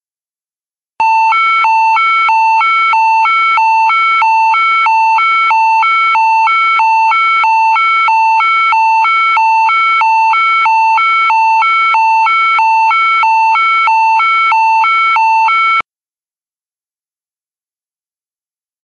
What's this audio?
Hi-Low Sound 1
Siren, Alarm, Emergency